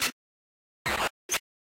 Beat murder
A few sample cuts from my song The Man (totally processed)
techno, glitchbreak, glitch, freaky, breakcore